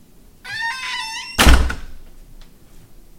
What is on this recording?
squeeky office door shuts
door, shuts